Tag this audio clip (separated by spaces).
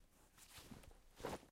bag rucksack